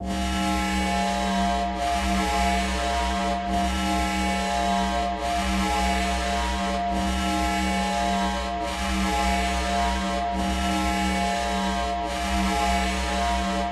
Space Station Alarm
Just an alarm sound i made in a DAW. Thought it sounded kinda spacey.
Warp, Outer-Space, Aliens